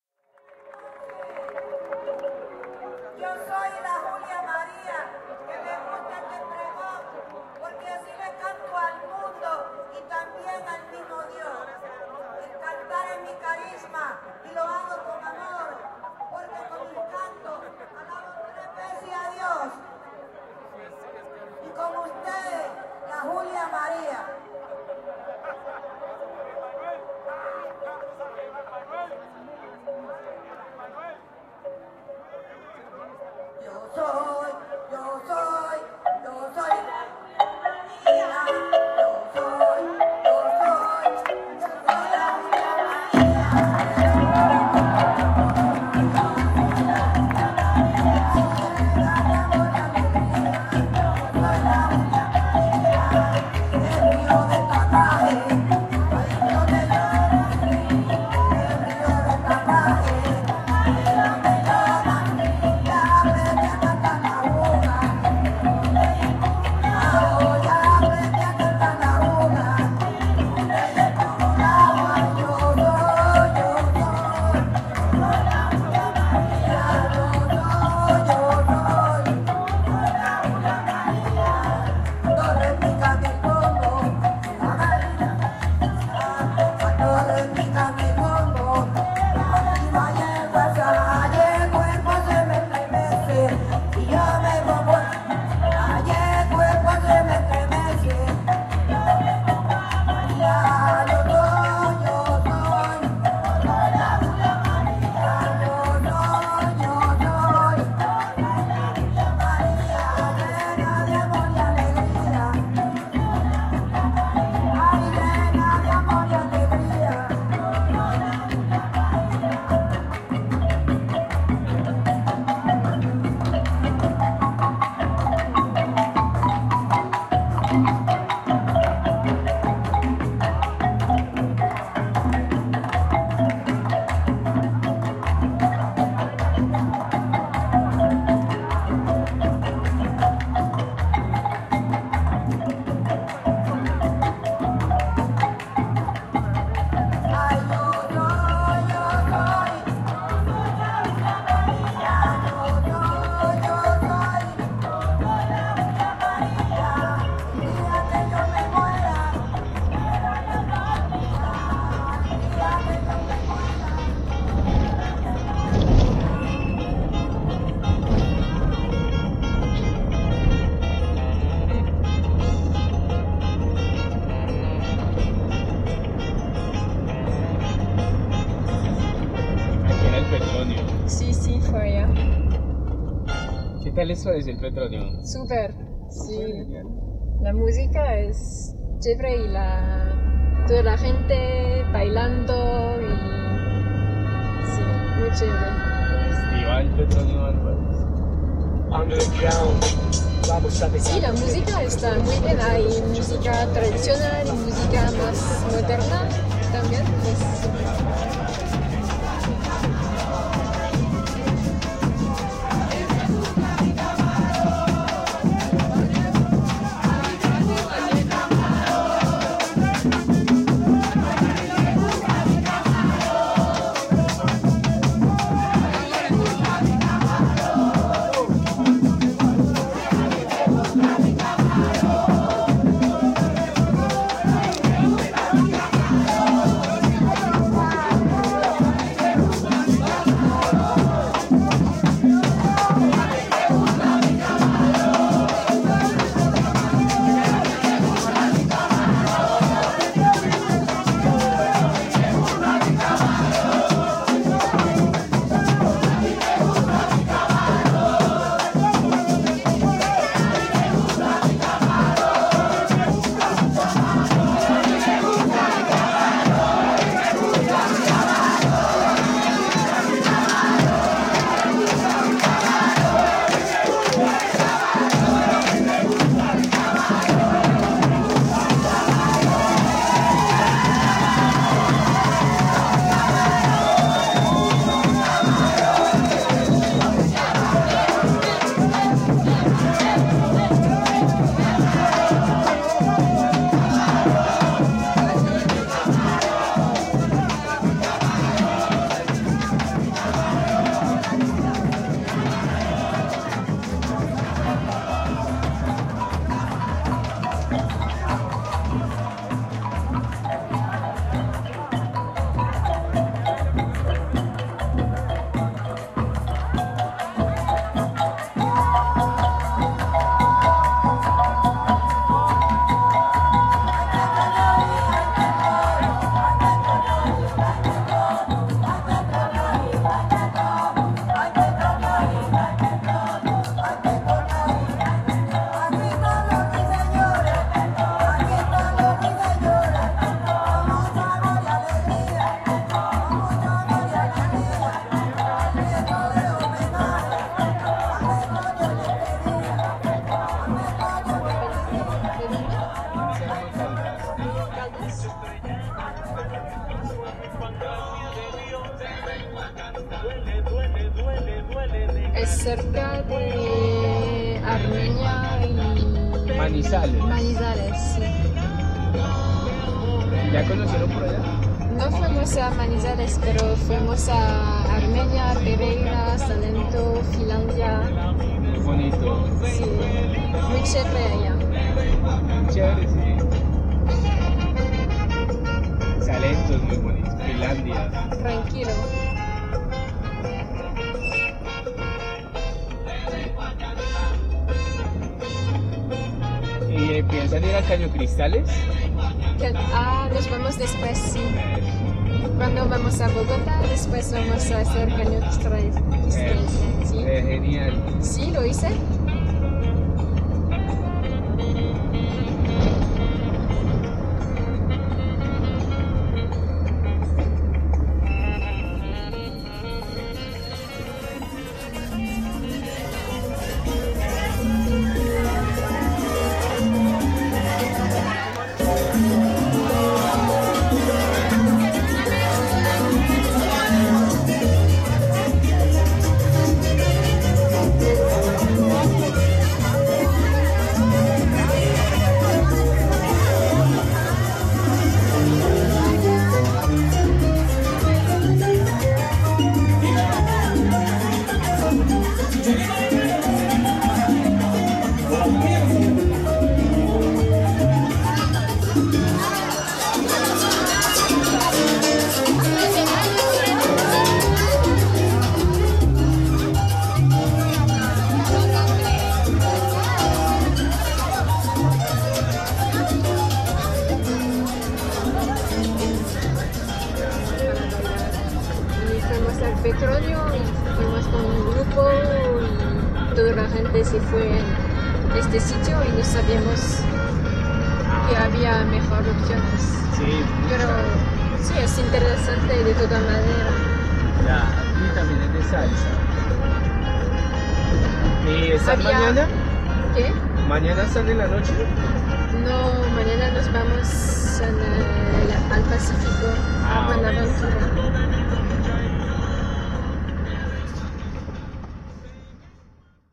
El Petronio Alvarez, remate and taxi, Cali
Mix of recordings from the 2017 Petronio Alvarez music festical in Cali with a taxi journey and after-party (remate) experiences
colombia, music, marimba, Pacific, festival, Cali